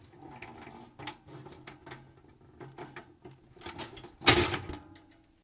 Closing a metal latch
buzz, latch, machine, mechanical, whir